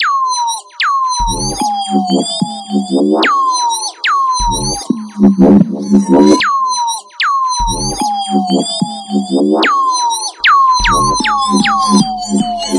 Ego Tripping
Smooth,Bassy,Soft,Hype,Dreamy